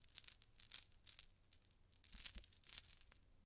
Jewelry: walking and moving your arm with jewelry. OWI. Recorded with a Rode Ntg-2 dynamic microphone and Zoom H6 recorder. Post processed to heighten the jingling. Recorded in a sound booth at Open Window Institute with a beaded bracelet.
jingle, jewelry, beads